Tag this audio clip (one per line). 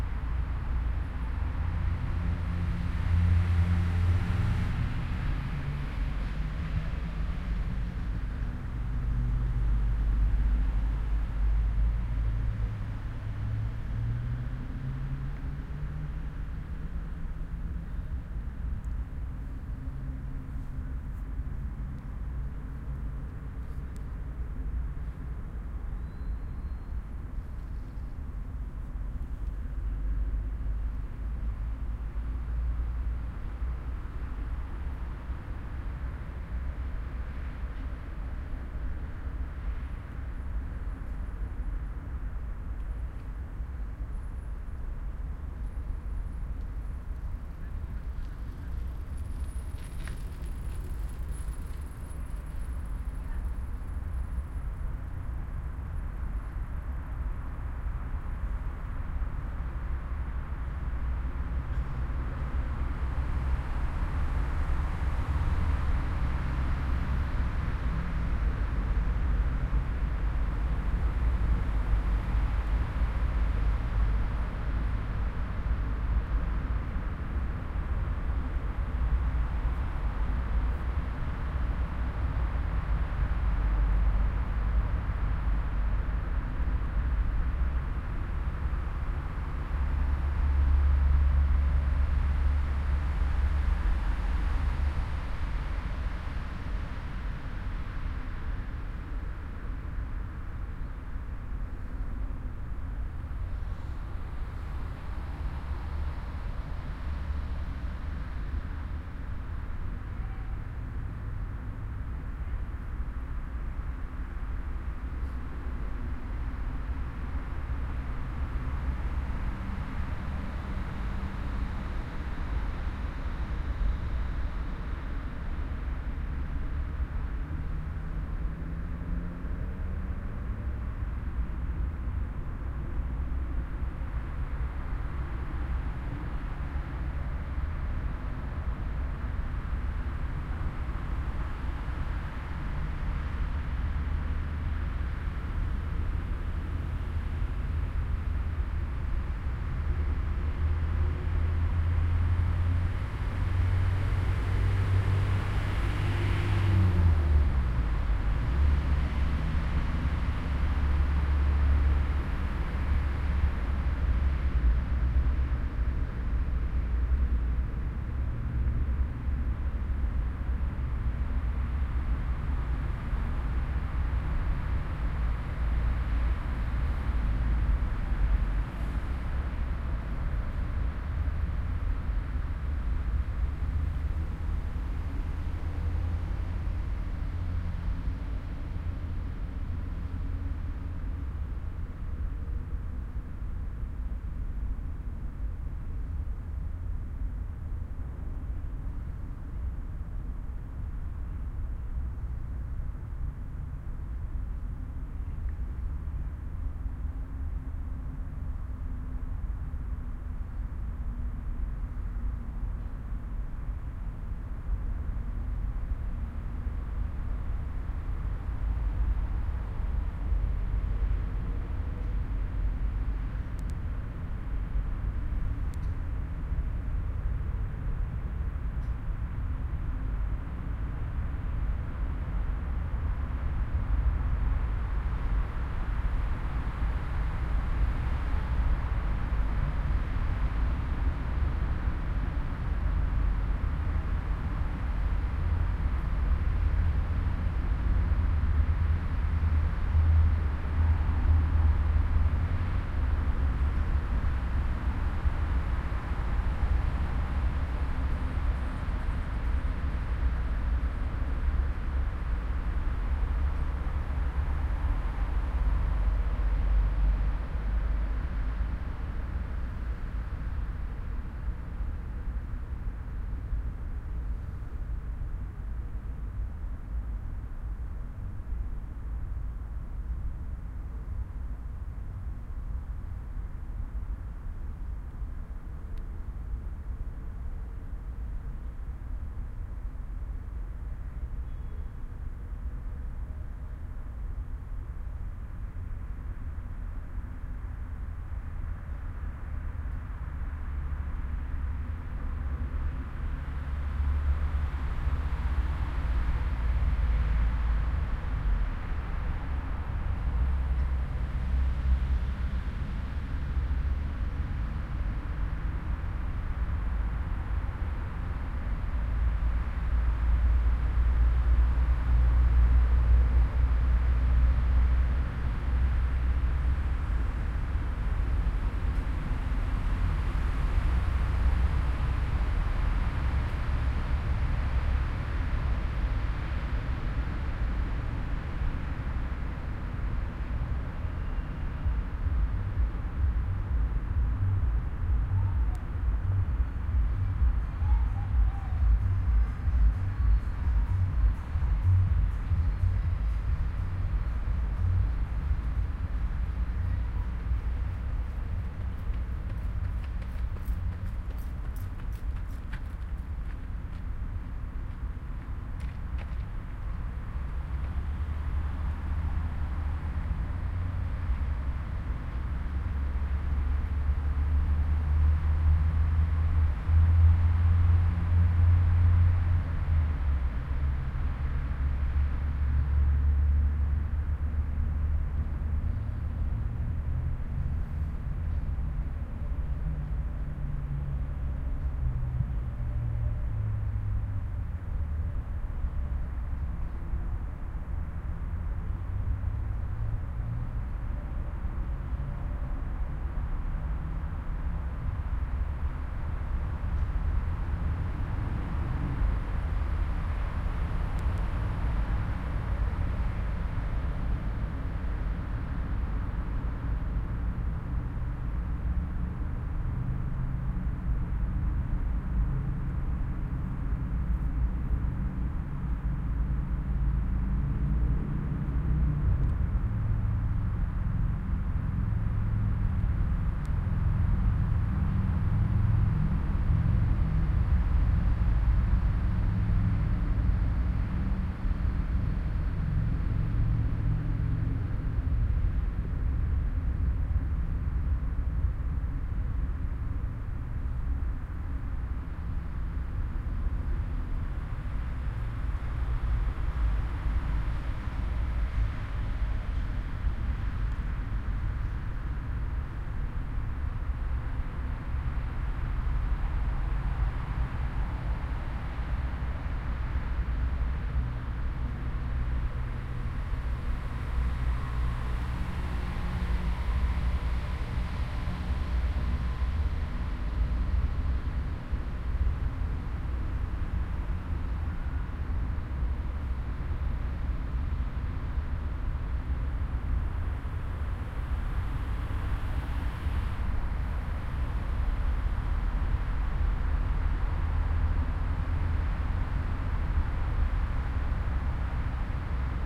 binaural
city
city-park
midnight
park
soundscape
traffic
turia-garden
urban